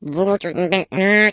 Computer game character sound. Created as part of the IDGA 48 hour game making competition.